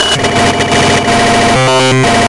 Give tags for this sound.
noise-channel; sci-fi; signal; experimental

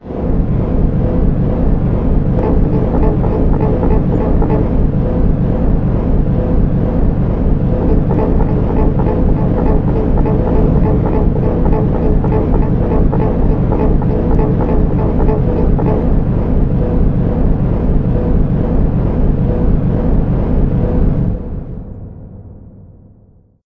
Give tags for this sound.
spaceship; engine; machine; alien; simple

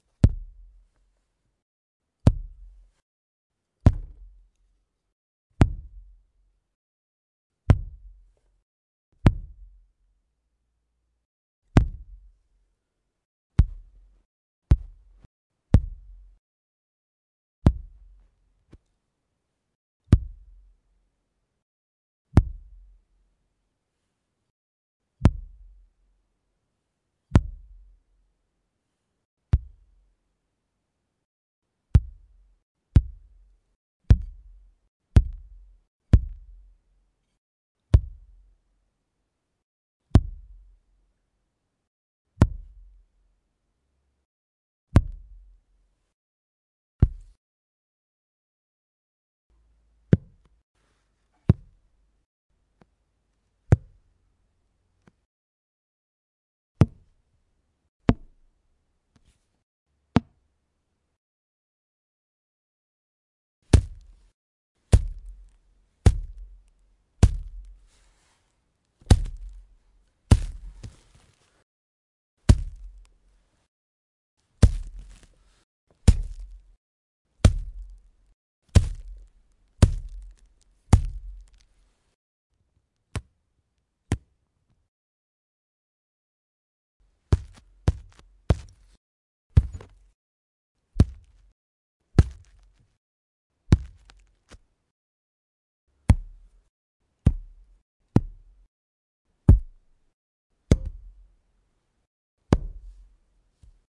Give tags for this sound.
close-up; drum

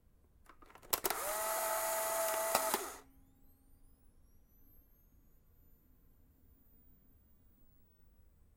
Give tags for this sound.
shutter; camera; pro-tools; instant-camera; polaroid; onestep; flash-charging

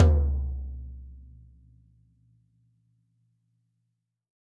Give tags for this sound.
24; bass; bit; dogantimur; drum; erkan; floor; hard; instrument; kick; medium; recorded; sample; snare; soft; studio; tom; unprocessed

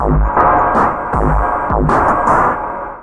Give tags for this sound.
808; noise; loop; nord; drum; beat; digital

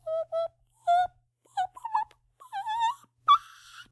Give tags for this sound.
squeak; boop; voice

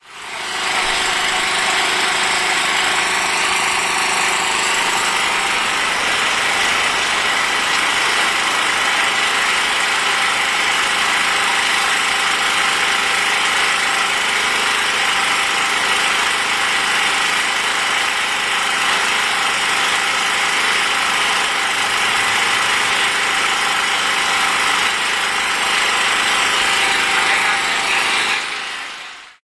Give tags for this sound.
poland; poznan; generator; field-recording; noise; islet

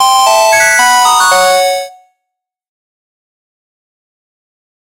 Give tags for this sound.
fx; effect; soundeffect; sound; pickup; freaky; sfx

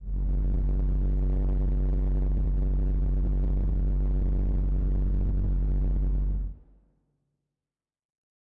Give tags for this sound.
drone; machinery; mechanical

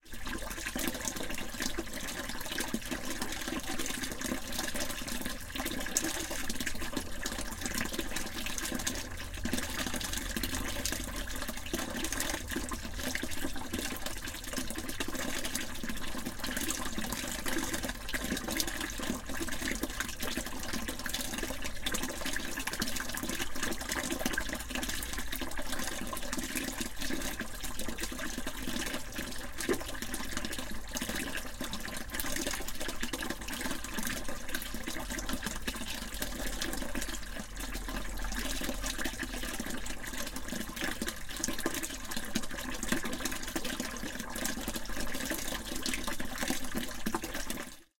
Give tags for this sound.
babble; city; friday; hungary; night; smelly; summer; tata; well

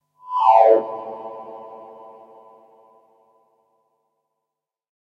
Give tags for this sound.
Accelerate; Effect

